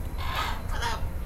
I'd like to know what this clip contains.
green winged macaw
A Green-winged Macaw makes a quiet squawking sound and then says "Hello". Recorded with an Edirol R-09HR.